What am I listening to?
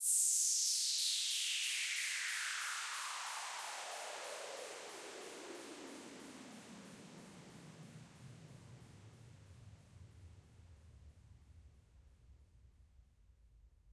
I made it my sound with gladiator synth.